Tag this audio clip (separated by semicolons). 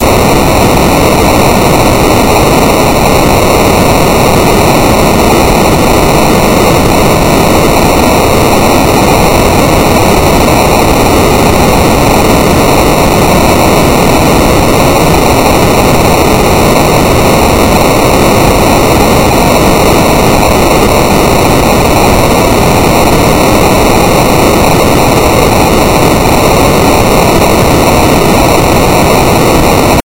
frequency; low; noise; step